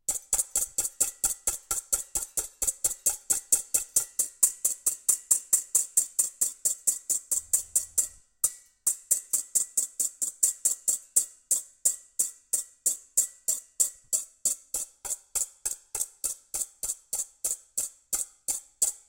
Kitchen Whisk Bowl-01
This recording is from a range of SFX I recorded for a piece of music I composed using only stuff that I found in my kitchen.
Recorded using a Roland R-26 portable recorder.
Cooking, Indoors, Percussion, Foley, House, Household, Kitchen, Home